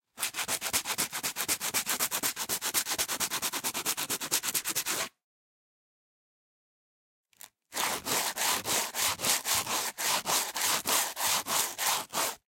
14 sand paper
Šmirgl papír - opracovávání dřeva.
CZ, Czech, mirgl, Pansk, Panska, pap, paper, r, sand